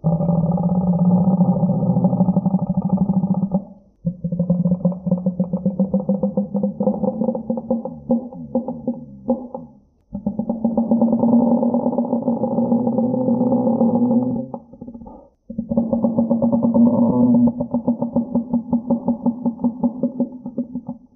Underwater Crab-like Monster Growl
Animal, Creature, Fish, Growl, Leviathan, Monster, Roar, Subnautica, Underwater